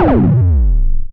Rough drum 137 LongKick
Synthesized lo-fi long kick drum sound created with a VST instrument called NoizDumpster, by The Lower Rhythm.
You can find it here:
This sound came from a recording session on 15-Jun-2013 in Ableton Live. I went through the recordings and selected the most interesting sounds.
synth-percussion, lo-fi, harsh, TLR, digital, noise, electronic, synthesized, floppy, NoizDumpster, TheLowerRhythm, VST, kick-drum